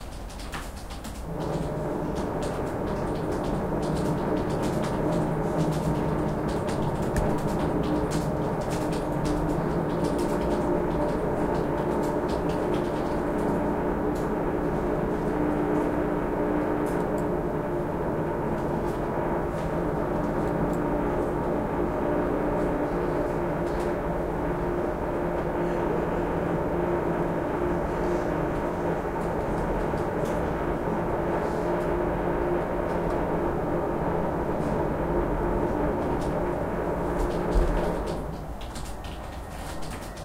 Rumble in the office. Construction works behind the wall. Guys, here you can hear how we are heroically works in the roar.
Recorded: 2012-10-30.
AB-stereo
city, din, keyboard, noise, office, roar, rumble, thunder, work